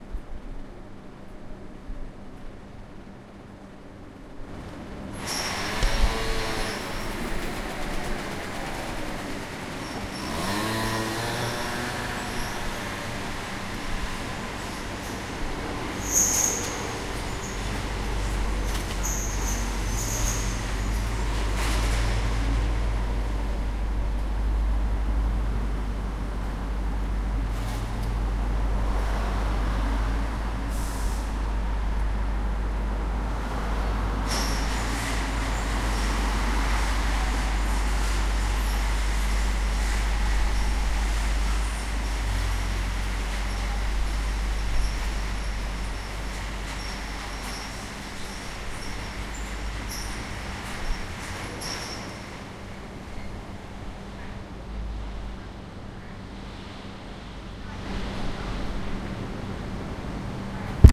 1 minute of Brisbane city around midnight.